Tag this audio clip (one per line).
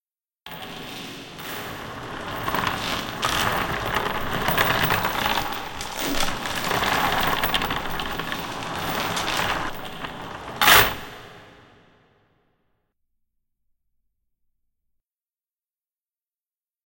chains
dry